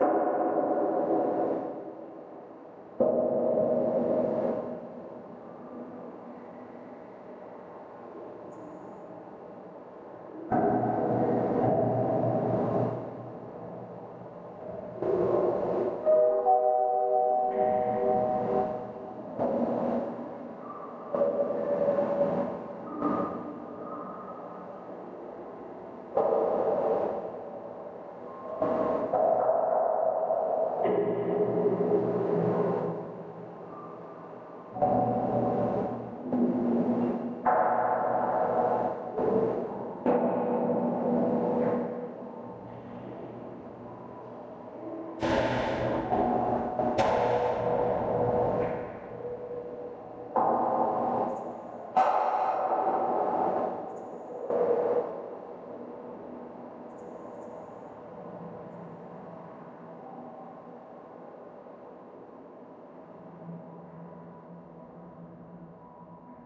Spooky Ambient
A one minute sample that can be used as an ambient backround sound. A lot of hits, noise and a some other sounds.
I made this sample in Fl Studio 12.
Was recorded with "HD webcam C310".